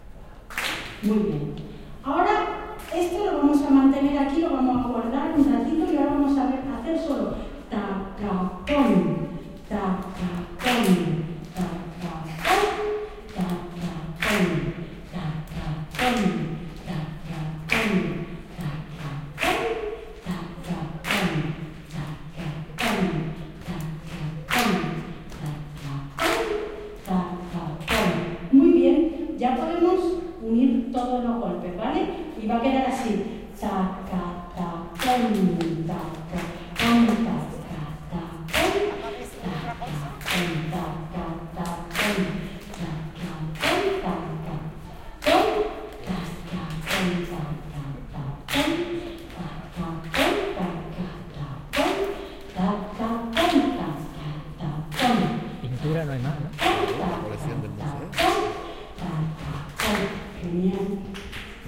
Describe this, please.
20070228.flamenco.lesson.00
a female voice instructing on a basic flamenco rhythm. Audience clap hands, voices in background.Edirol R09 internal mics
voice, percussion, flamenco, hand-clapping, field-recording